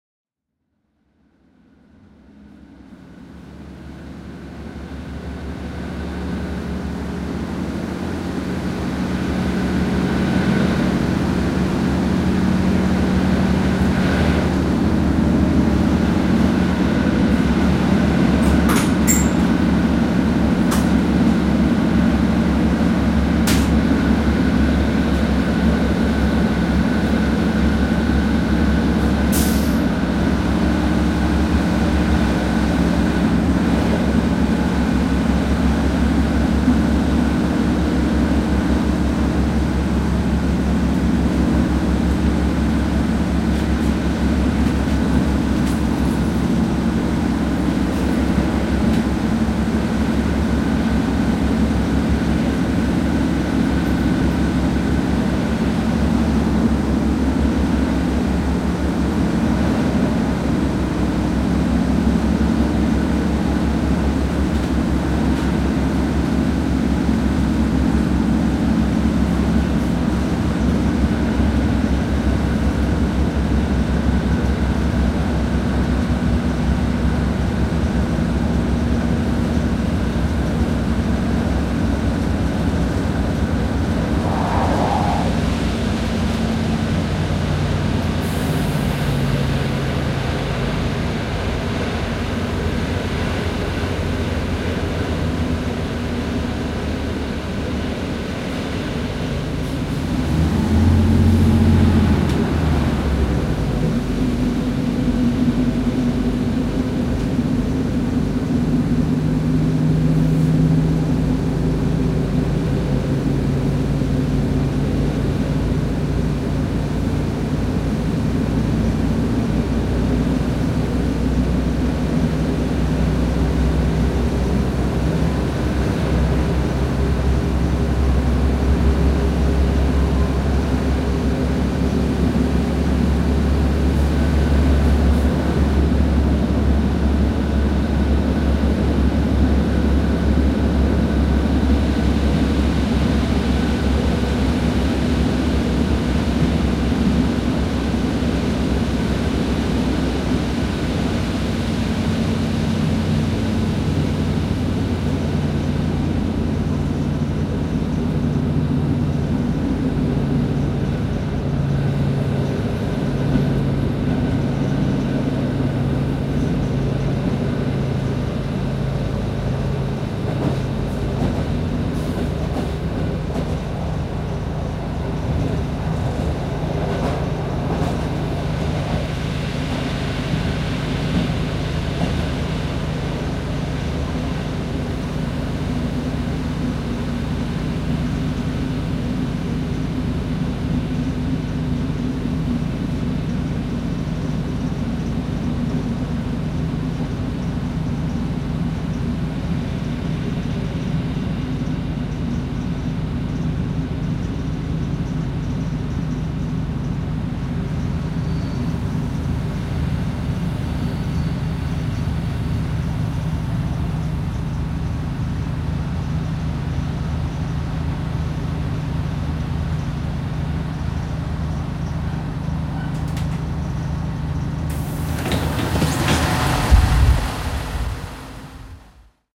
[004] from Balestrate to Castellammare del Golfo (Trapani)
date: 2011, 29th Dec.
time: 08:15 PM
place: Balestrate; Castellammare del Golfo (Trapani)
description: Last quiet part of the journey, first to get off the train
palermo, train, noise